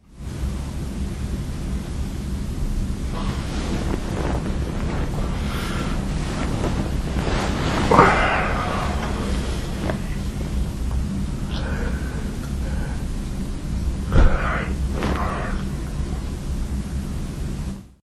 Moving while I sleep. I didn't switch off my Olympus WS-100 so it was recorded.
human, breath, body, lofi, bed, household, field-recording, noise, nature